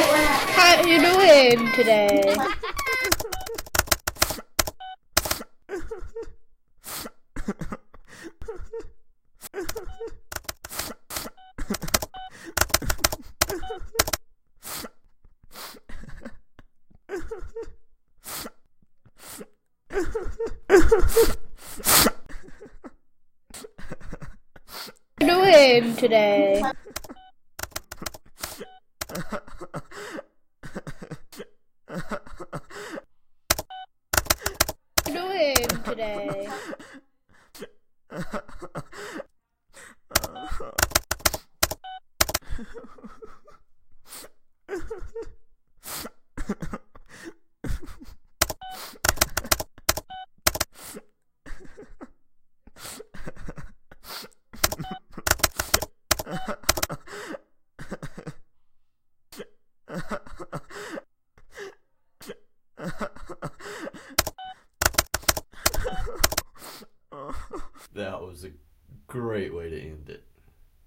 ATM-cry
someone's bank account is empty...
ATM, clicks, cry